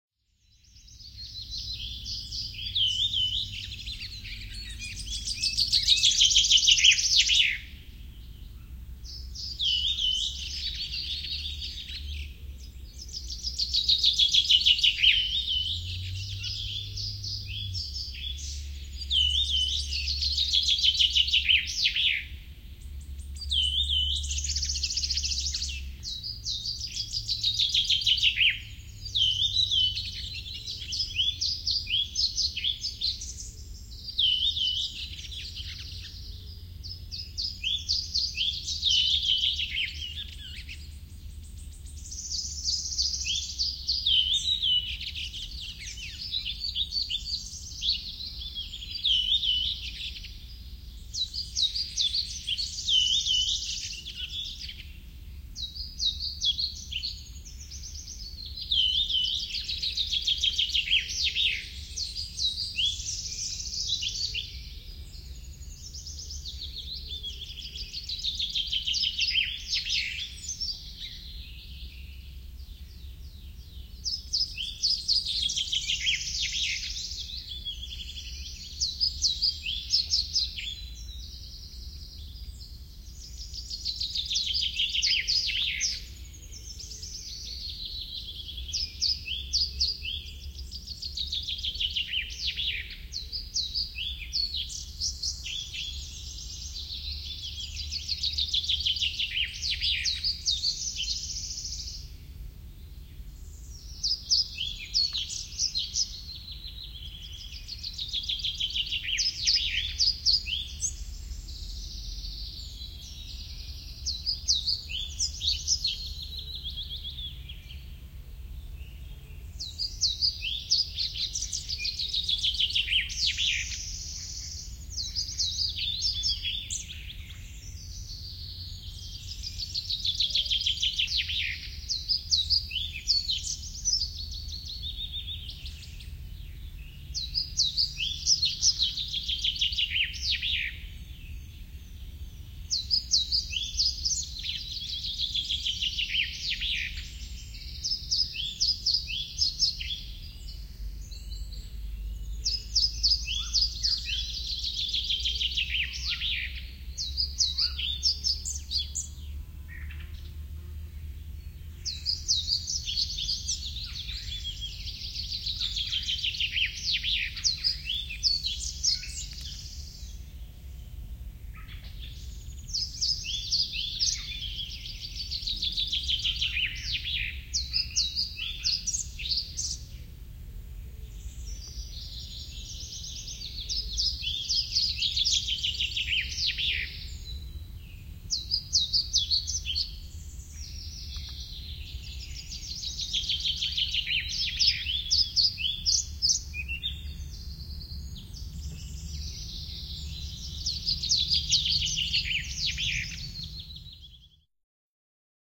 Linnunlaulu, lintuja metsässä / Birdsong, birds singing lively in the woods in the spring, e.g. redwing, chaffinch, wood warbler, pied flycatcher
Linnut laulavat vilkkaasti metsässä, kevät, mm. punakylkirastas, peippo, sirittäjä, kirjosieppo.
Paikka/Place: Suomi / Finland / Lohja, Karkali
Aika/Date: 09.05.1996
Birds, Birdsong, Field-Recording, Finland, Finnish-Broadcasting-Company, Linnunlaulu, Linnut, Luonto, Nature, Soundfx, Spring, Tehosteet, Yle, Yleisradio